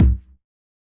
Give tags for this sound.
bass
bassd
bass-drum
bassdrum
bd
deep
drum
floor
hard
kick
kickdrum
kicks
layered
low
processed
synthetic

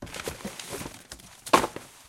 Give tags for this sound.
clatter
objects
random
rumble
rummage

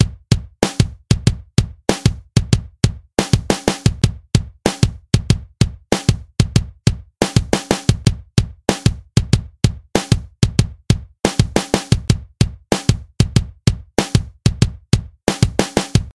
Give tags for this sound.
08
11
11-08
8
pattern

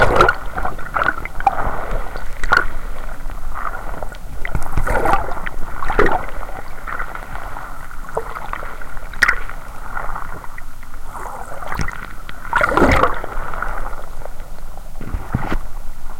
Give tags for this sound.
hydrophone
ocean
sea
underwater
waves

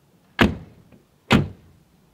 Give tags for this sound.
slam
closes
door
close
car
outdoor
closing

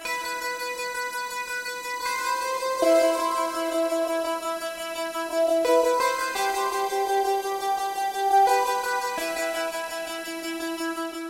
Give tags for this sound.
high
thin

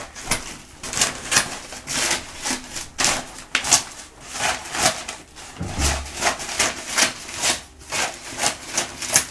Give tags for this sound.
cutting
paper

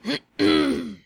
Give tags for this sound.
throat
clear
girl
voice

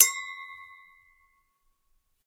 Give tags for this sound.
clinking
wine
glasses
wine-glass
glass

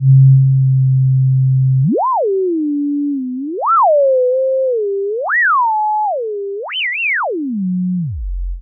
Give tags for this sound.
electronic
sweep
supercollider
sound

